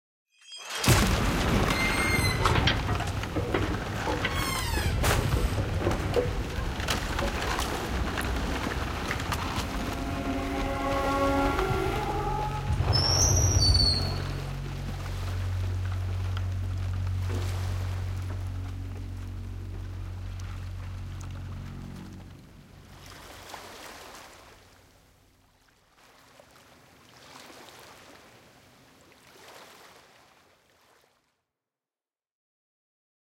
Boat Violently Beaching onto Shore - WITH reverb
Sound of a boat that's sailing at fast speeds crashing into a sandy shore. It drags along for a moment before screeching to a halt. With added reverb.
Taken from my Viking audio drama: Where the Thunder Strikes
Check that story out here:
Never stop pluggin', am I right? haha.
It's made from four individual samples taken from this site. All the credits for this sound compilation are bellow. Also tried to include notes on what I changed for those curious.
1.) SoundFlakes
-This is the initial impact. The loudest sound. Not much was altered with this sound, side chain compression ducks the rest of the sound to make room for this one.
2.) supermatt1896
Titanic Collision
-This is the most prominent sound. I snipped it somewhere in the mid section, shortening it. Also removed all frequencies bellow 100hz and over 17khz. (Please not this sample is composed of other samples, the credit of which is on supermatt1896's page)
3.) Sophia_C
-This is the sound of wood falling down all around.
Hollywood, Viking, action, beach, beaching, beat, boat, booming, cinematic, collision, crash, drag, dramatic, effect, epic, fantasy, film, heroic, hull, impact, mastered, movie, pirate, rhythm, rhythmic, sound-effect, suspense, tense, thrill